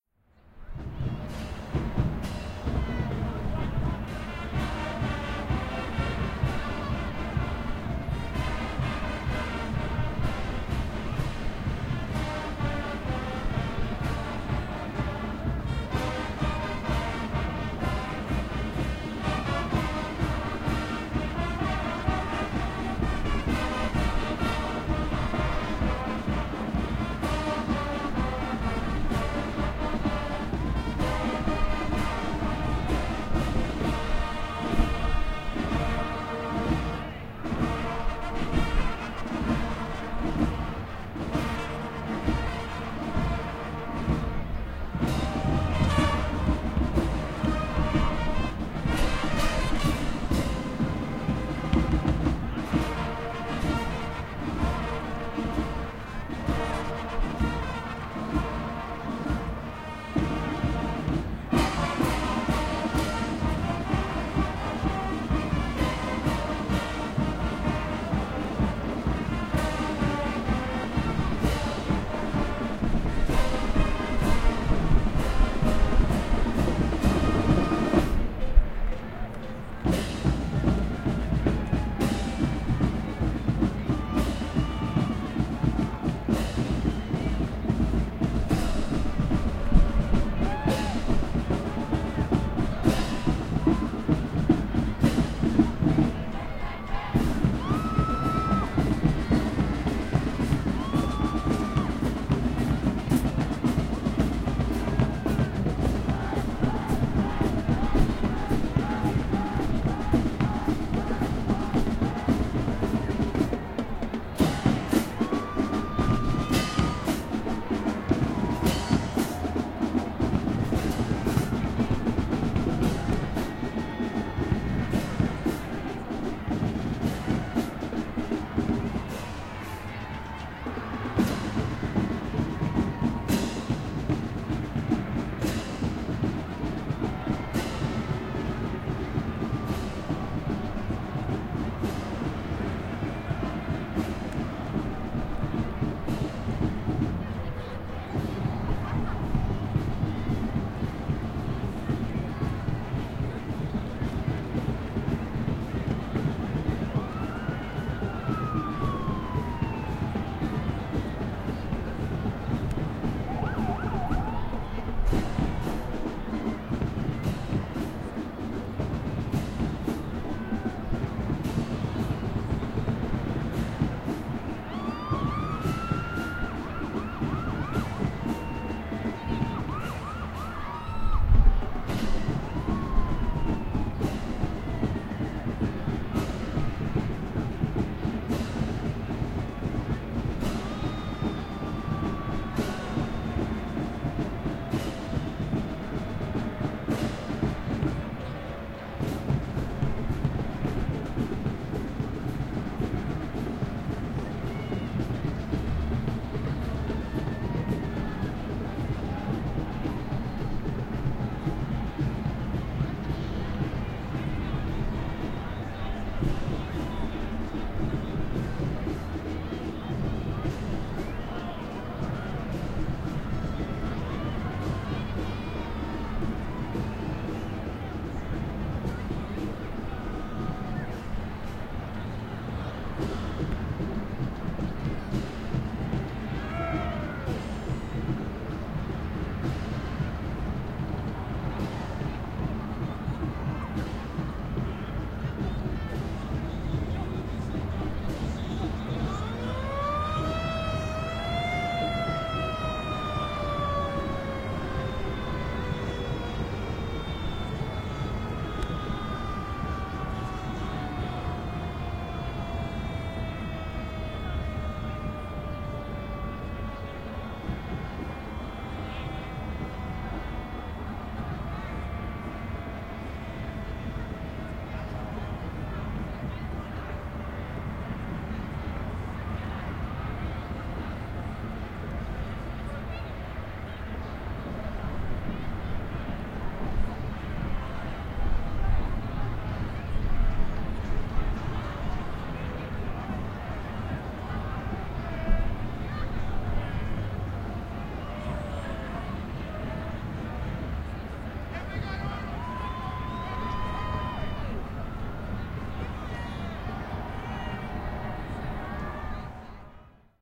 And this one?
bm PittsStPatParade
St. Patrick's day parade in Pittsburgh.
pittsburgh, saint-patrick